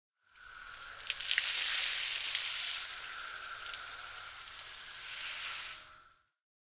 water sea spray E02
A hose spray nozzle spraying while passing the mic. Can be used as sweetener for sea spray hitting the deck of a ship.
hose, nozzle, sea-spray, ship, spray, water